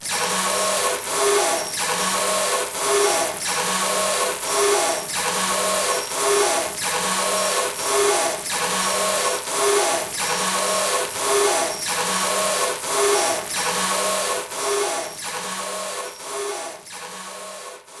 defective machine L38 m
Simulation of a defective screeching machine